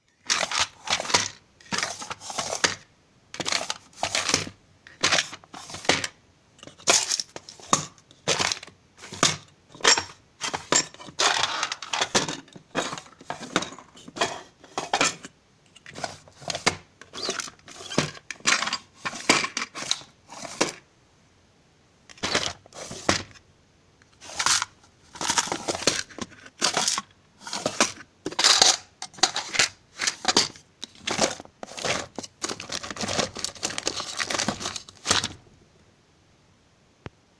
Opening and Closing Small Plastic Drawers with small components 2
Opening and Closing Small Plastic Drawers with small components
Drawers, Opening, small, components, Closing